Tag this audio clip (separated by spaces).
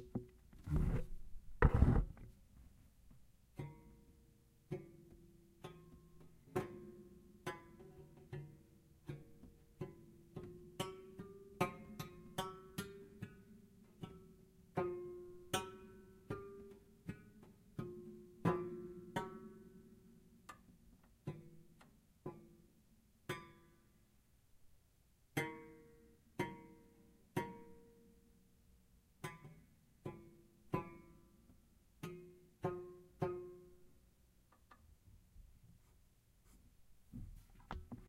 pizzicato piano Strings